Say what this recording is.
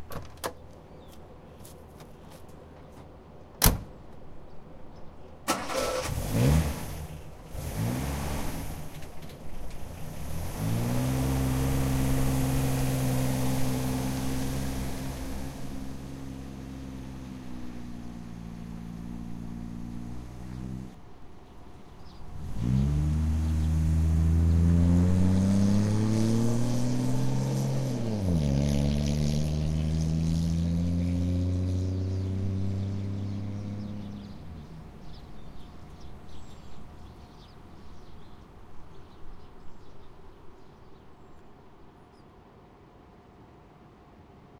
A sequenced recording of a 1961 Holden EK with door opening-closeing, strating up, reversing and driving off. Some birds and wind in backgound. Recorded in kumeu, near auckland in Aotearoa, new zealand.